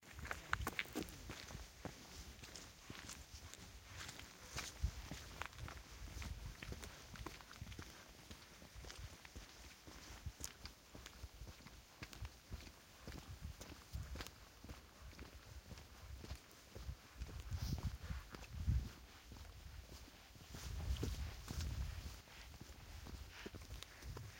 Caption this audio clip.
Steps on mountain gravel, stereo file.